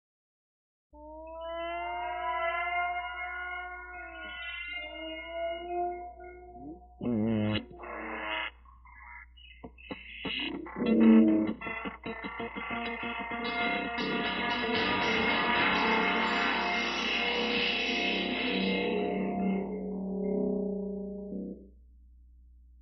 mangled guitars 3
Guitar tracked thru multiple fx recorded in logic 8 with a sm 57
guitars, distorted, mangled, processed, strange